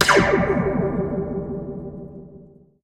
Use jgrzinich's laser gun blasts #2, first sample. Cut become 2.818 seconds, fade out end, right channel shift 0.05 second.
Audacity:
- Bass and Treble
Base: 30.0
Treble: 7.0
Level: 0.0
✓Enable level control
- Phaser
Stages: 14
Dry/Wet: 0
LFO Frequency: 0.1
LFP Start Phase: 70.0
Depth: 0
Feedback 70
Output gain 3.0
lazer, laser, space, sci-fi